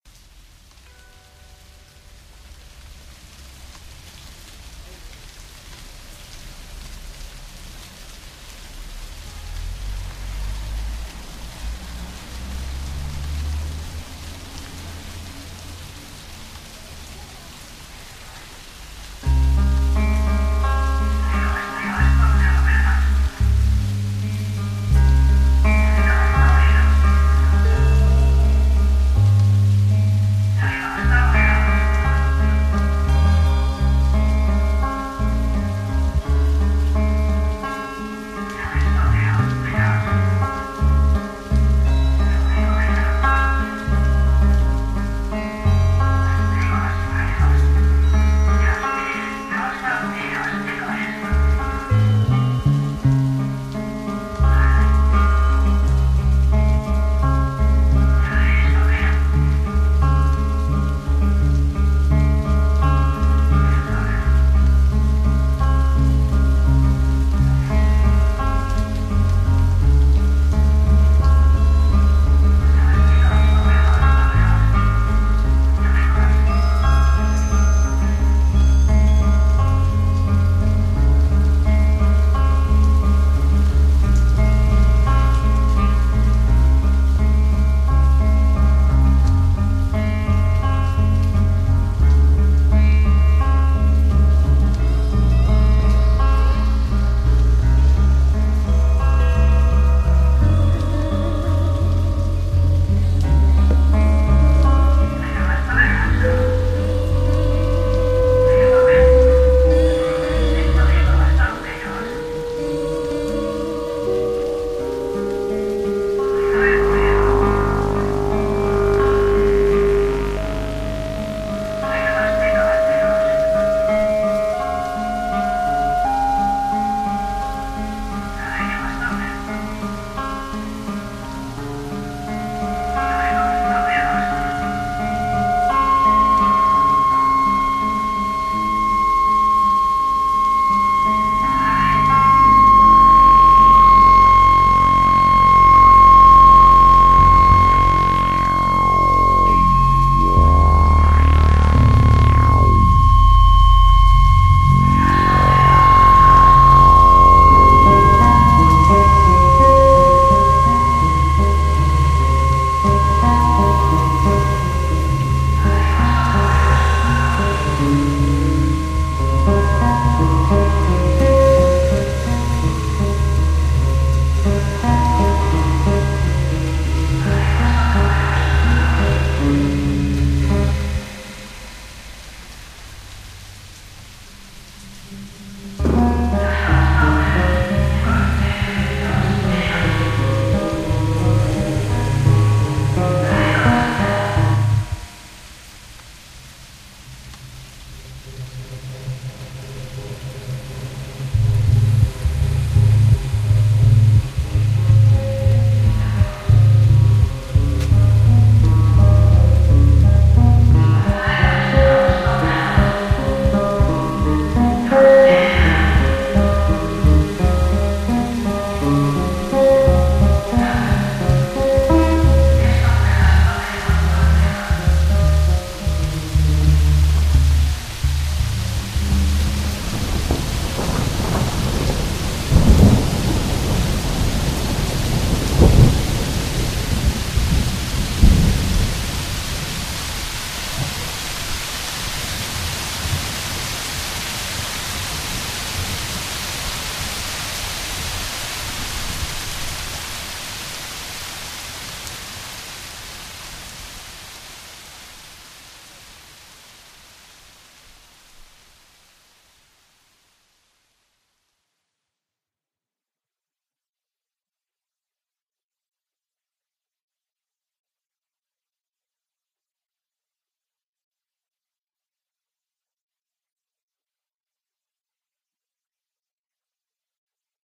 Collab with AdDm featuring Coco the parrot.Recorded directly into Cubase then sent to Holland for further processing. Sampled, stretched, manipulated.Featured on Infinite Sectors collab cd dedicated to animal tracks...